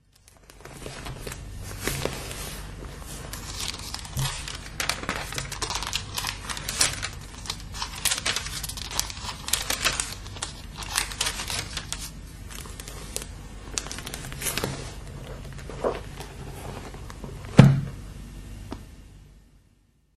Turning the pages of the book Ezra in the bible (dutch translation) the church has given my father in 1942. A few years later my father lost his religion. I haven't found it yet.
paper, book, turning-pages